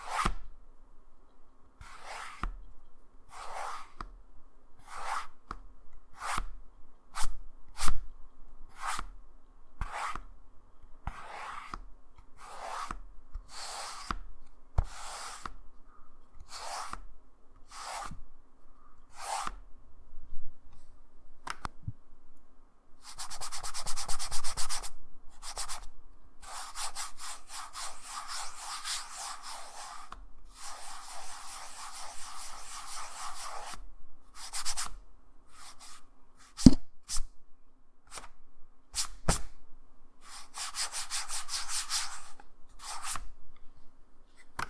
rubbing my finger in a platform
s, pencil, scribble, scribbling, paper, drawing, write, writing, cardboard, pen